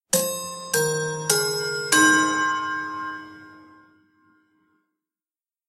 Westminster Quarters, Part 1 of 5
Plastic pen striking sequence of four rods from this set of grandfather clock chimes:
Roughly corresponds to C5, A#4, G#4, D#4 in scientific pitch notation, which is a key-shifted rendition of the first grouping from the Westminster Quarters:
westminster, hour, chiming, clockwork, chime, big-ben, clock, westminster-chimes, music, chimes, grandfather-clock, time, tune, westminster-quarters, cambridge-quarters, grandfather, strike